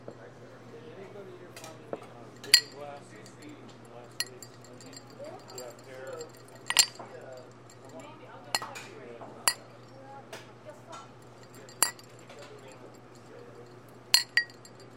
clinking glasses together
This is a recording of empty glasses clinking together on th ecounter of the Folsom St. Coffee Co. in Boulder, Colorado.
clink, coffee, glasses, shop